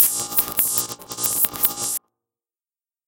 I created this sound with audacity using its pluck generator as the base sound
then modding it into a somewhat electrical sound
fx, Power, Electricity, sound, sfx